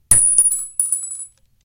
A large pack with a nice variety of bullet shells landing on the ground. (Just for you action film people :D)
I would like to note, however, something went wrong acoustically when recording the big .30-06 shells dropping to the ground (I think my recorder was too close when they hit) and so they have some weird tones going on in there. Aside from that, the endings of those files are relatively usable. If anyone can explain to me what went on technically, I would appreciate that as well.
All shells were dropped onto clean concrete in a closed environment, as to maintain the best possible quality level. (I had film work in mind when creating these.)